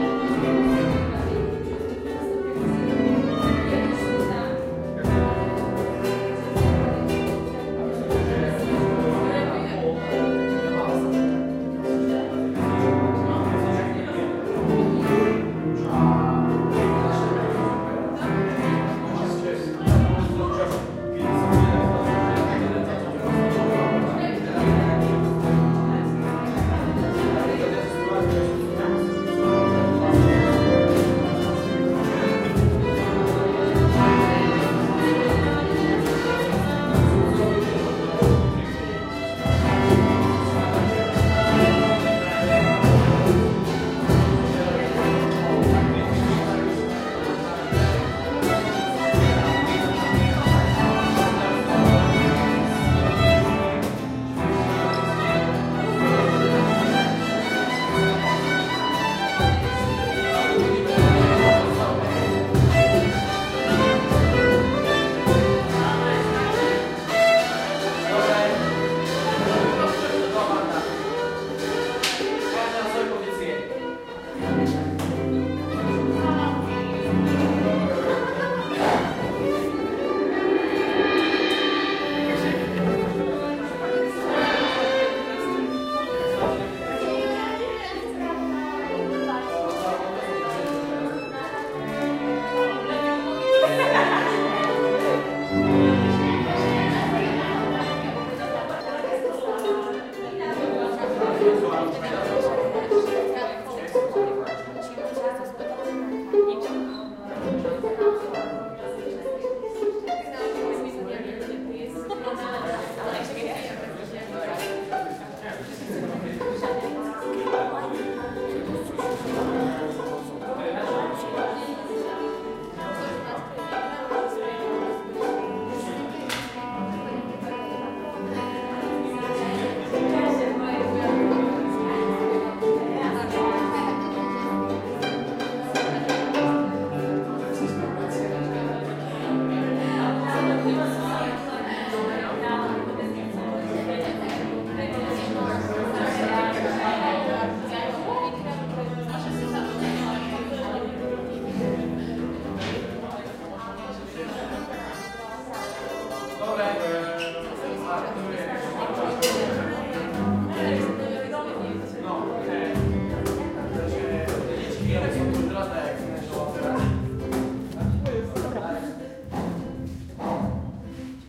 Musicians are preparing for a gig. Everybody plays something else, so the cacophony is extreme. Recorded with Zoom H1.